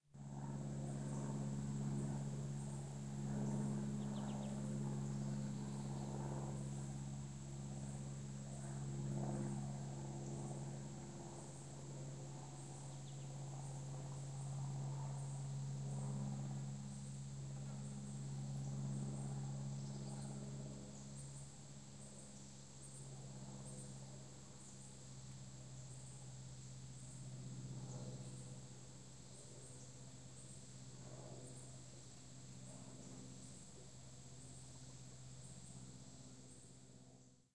Many find the sound of a prop-plane flying lazily very peaceful --- especially if it is a late Summer day against a blue sky on the edge of a Forest. Even though I was actually on an outing to record the birds of the deep forest in Summer, this plane came right overhead and, well, here it is. The ocassional soft chirp of the birds adds a nice touch.
Recorded with my Zoom H4N recorder with the internal microphones set on an input volume of 75.